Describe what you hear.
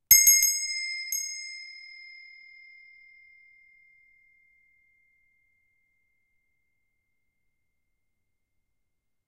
brass bell 01 take5
This is the recording of a small brass bell.
bell, brass, ding